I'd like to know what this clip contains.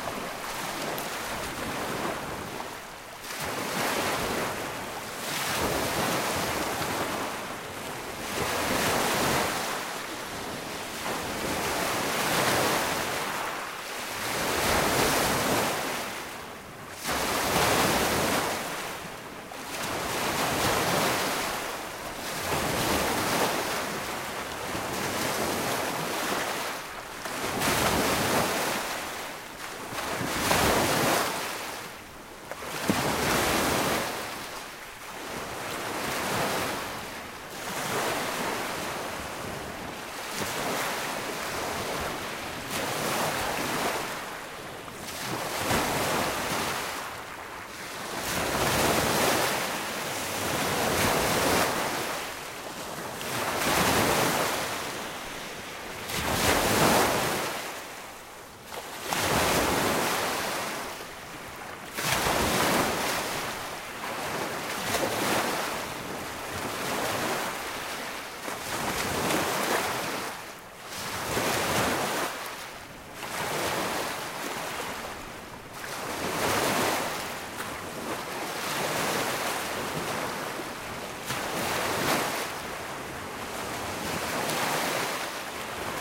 Waves recorded using Zoom H6